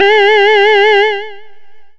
Basic pulse wave 1 G#4
This sample is part of the "Basic pulse wave 1" sample pack. It is a
multisample to import into your favorite sampler. It is a basic pulse
waveform with a little LFO
on the pitch. There is a little bit of low pass filtering on the sound,
so the high frequencies are not very prominent. In the sample pack
there are 16 samples evenly spread across 5 octaves (C1 till C6). The
note in the sample name (C, E or G#) does indicate the pitch of the
sound. The sound was created with a Theremin emulation ensemble from
the user library of Reaktor. After that normalizing and fades were applied within Cubase SX.
pulse, reaktor, multisample, basic-waveform